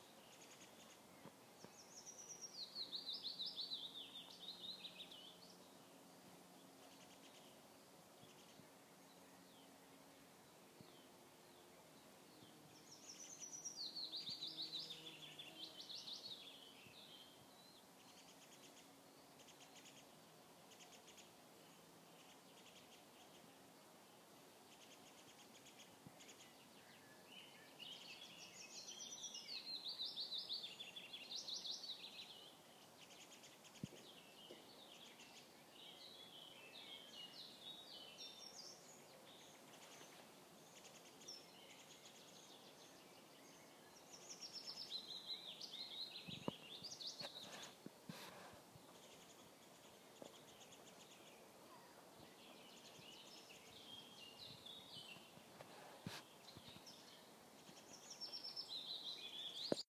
Birds in a forest
A small forest with birds.
Recorded in mono with iPhone 6.
bird, field-recording